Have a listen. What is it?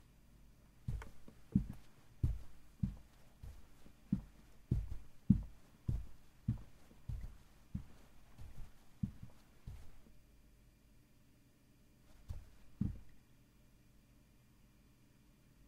Schritte BootsTeppich
Foley of steps in boots on carpet.
Foley, Stiefel, Teppichboden, boots, carpet, footstep, footsteps, room, step, steps, walk, walking